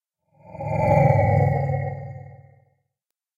A stereo recording of a futuristic vehicle passing.